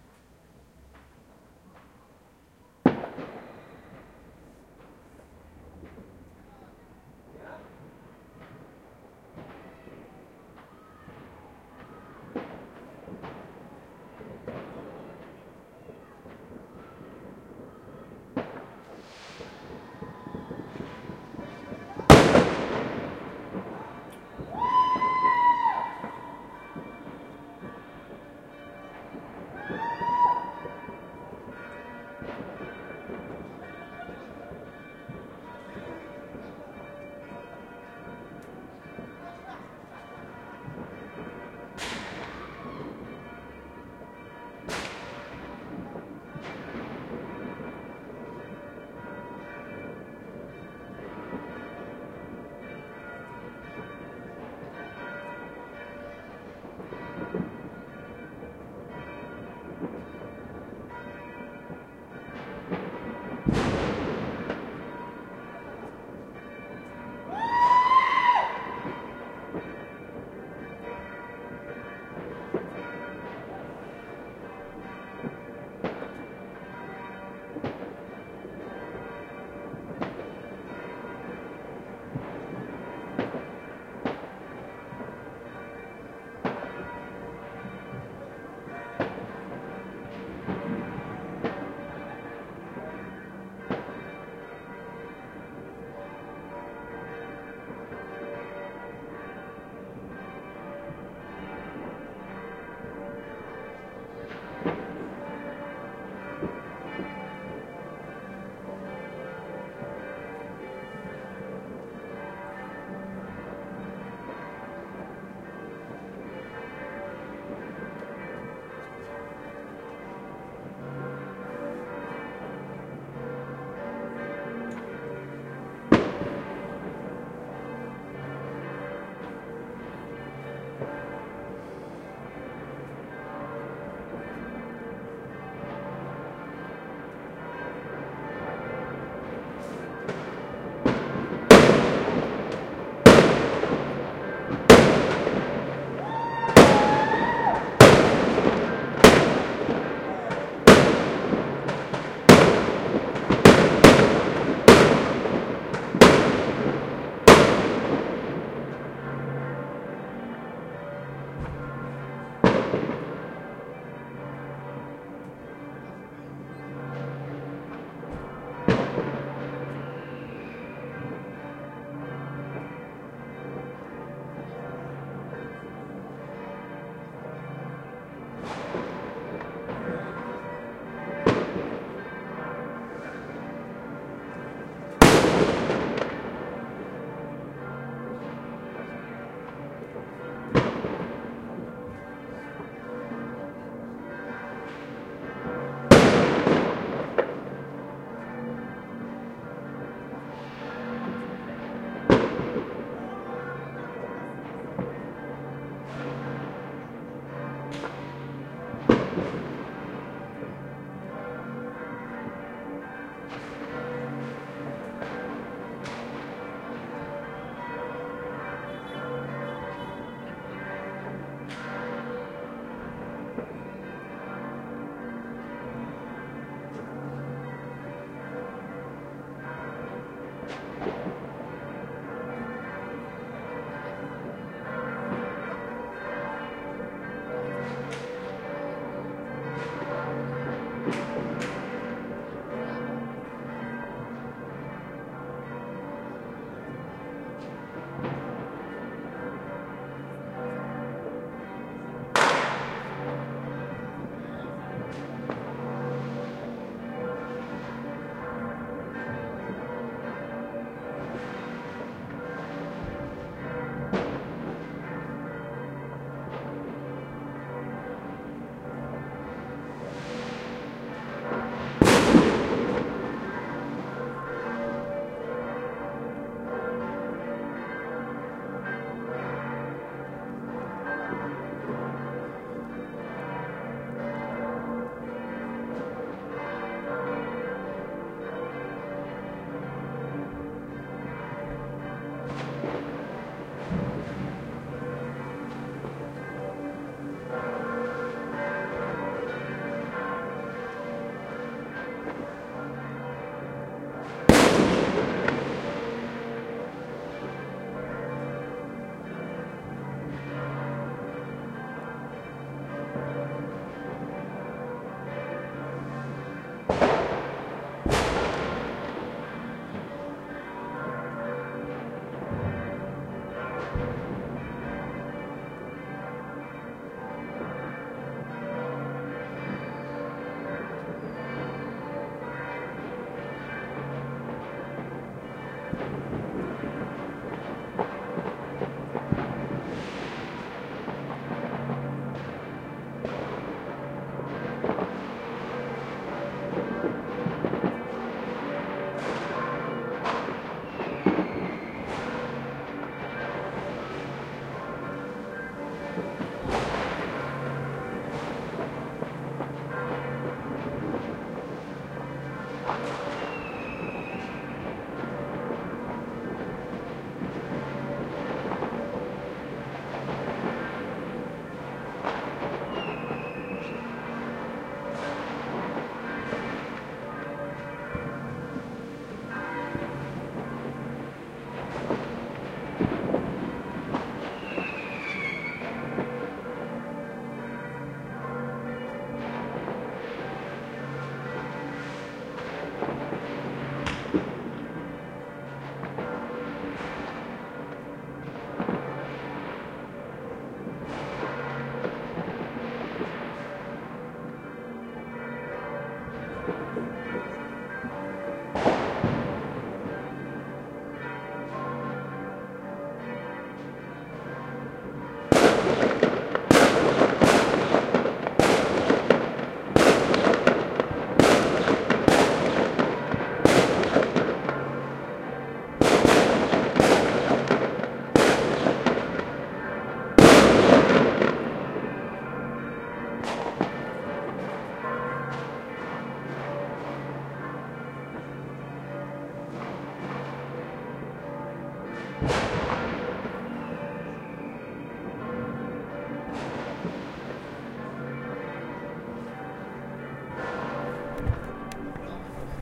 new years eve churchbells+fireworks at midnight+people+atmospheric sounds outdoors 001
new years eve outdoors at midnight: church bells, fireworks, people
ambiance, ambience, ambient, atmo, atmos, atmosphere, background, background-sound, bells, church, field-recording, fireworks, midnight, new-years-eve, outdoor, outdoors, people, ringing, soundscape